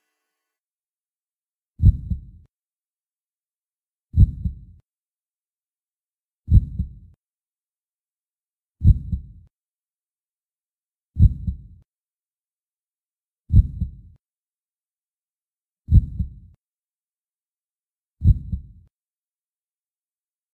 Heart beating slowly (26 bpm)
cor, corazon, heart